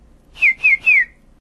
A person whistling like a bird chirp. A high note sliding downward, repeated three times quickly.